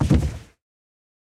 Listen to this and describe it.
Human body fall
A human body (actually mine) falling to the floor. Recorded with AKG Solidtube mics into a digital Spirit Soundcraft mixer.
CⓇEATED by ΟptronTeam
Human-Body, Pass-out, Drop-dead, Thud